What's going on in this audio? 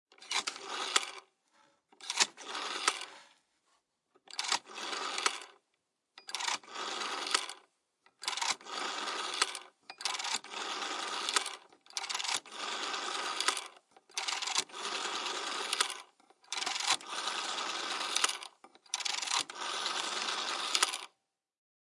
Phone with a rotary dial
Sound of the dialing disc on the telephone. Please write in the comments where you used this sound. Thanks!
call, communication, connection, dialer, disk, phone, ratchet, rotation, telephone, vintage